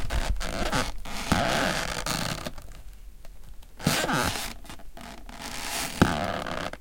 knakande trä 1
Creaking some tree. Recorded with Zoom H4.
crackling, tree